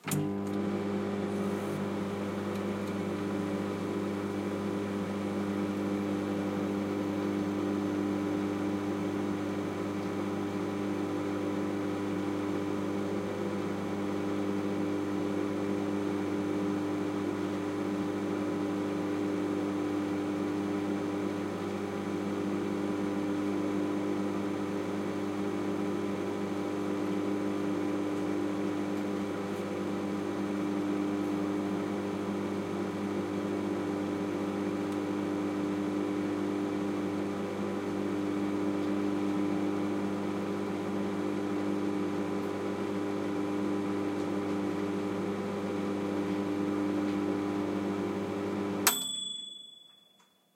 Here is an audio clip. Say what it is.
Microwave Clean
This recording is of my microwave cooking, including the ping from the timer. The sound is a low rumble as its operational for about 45 seconds then there's the microwave time ping's to mark the end of the timer. This recording differs from my other Microwave recording as I've removed the rumble from the microwave in post, so it produces a much cleaner sound.
It was recorded using the XYH-6 Microphone on my ZOOM H6.
Compression, clip gain and a High pass filter was used during post.
Appliance, Beep, Cooking, Household, Hum, Kitchen, Machine, Microwave, ping, rumble, ZOOM-H6